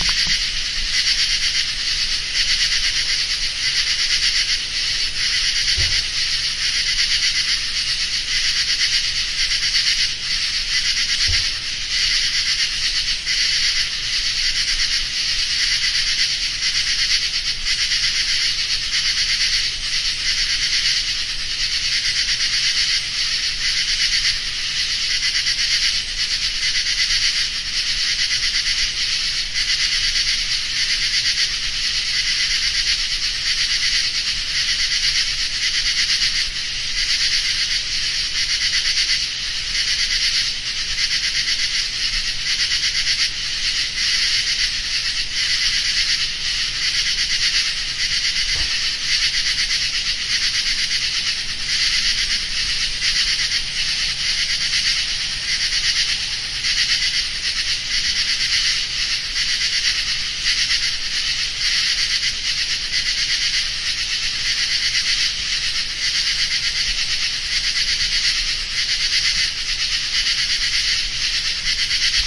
Arrow Rock Nocturne 05
Ambient recording of insects at night in Arrow Rock, Missouri, USA. Recorded July 2, 2012 using a Sony PCM-D50 recorder with built-in stereo mics. The insects are very loud through the night. The taller the trees, the thicker they seem to cluster. This is the biggest tree, and the loudest track recorded that night. Good separation of voices in stereo.